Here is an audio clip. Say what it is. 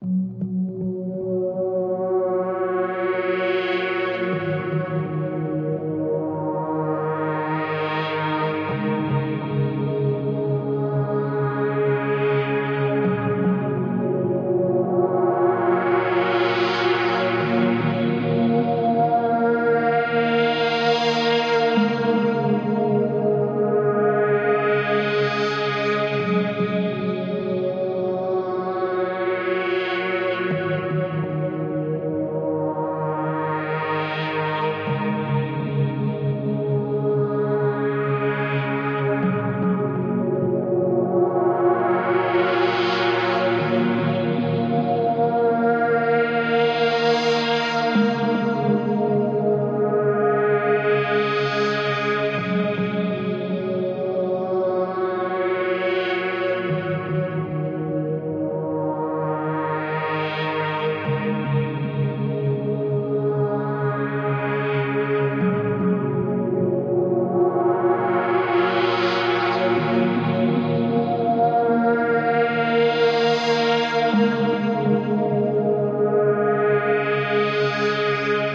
guitar overthesea
electric guitar throw guitar rig software